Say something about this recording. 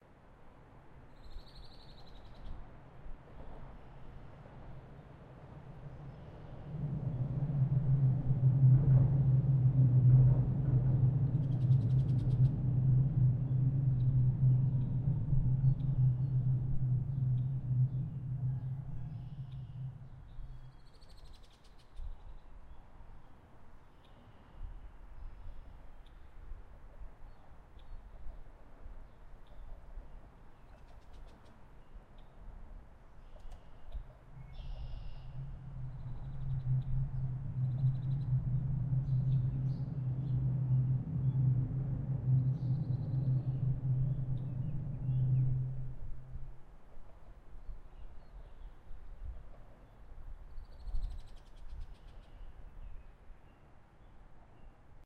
Subway rubbles overhead
Subway train approaches rumbles overhead on concrete trestle bridge. Birds singing, city hum BG.
birds, bridge, field-recording, rumble, subway, train